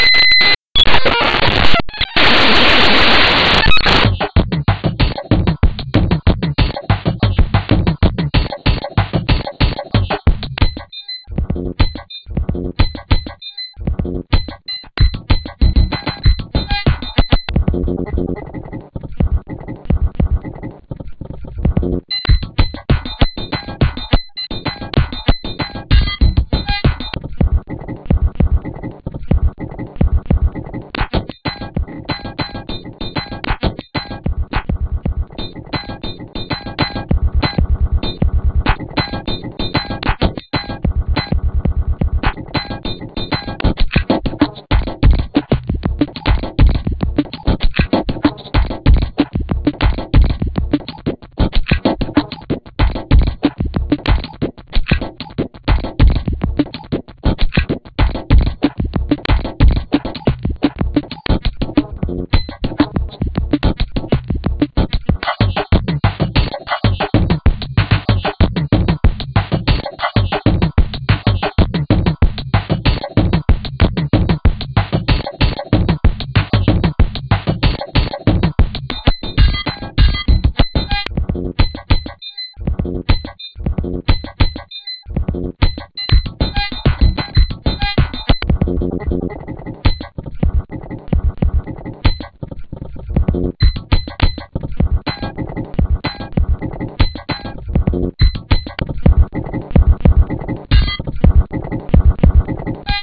11309 Raw-Glitch-Bank-HF
loop, noise